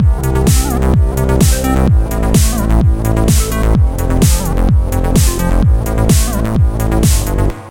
Techno loop
Just a cool little 4 cycle beat. Sounds awesome when looping it.
128bpm, dance, electro, hiphop, house, loop, techno